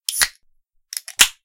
Carbonated can opening
I hold a carbonated can to my microphone and open it in two motions, one to release the pressure, and the other to open the hole the rest of the way. This was a 12oz (355ml) standard soda can (specifically Moxie) held in my hand a few inches from the mic, with some slight ambient humming removed in Audacity afterward.